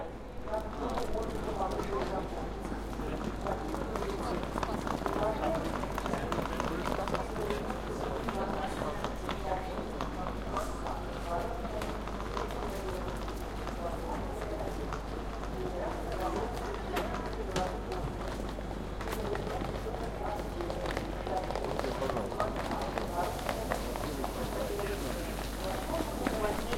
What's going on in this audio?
Suitcases are moving (rolling) down the platform
People talking
Voronezh main rail-way terminal